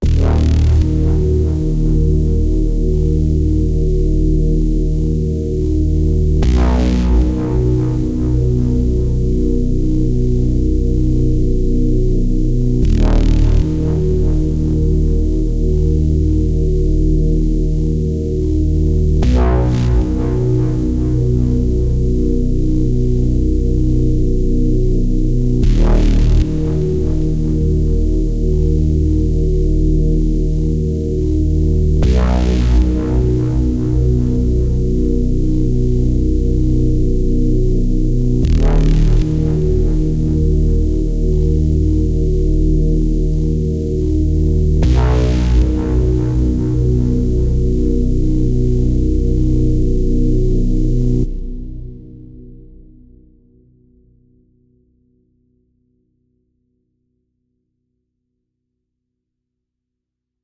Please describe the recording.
Spicy Crunchy 80s Synth Loop 2 [150bpm] [D Sharp Minor]
80s, crunchy, free, loop, melodic, melody, synth, synthwave, vaporwave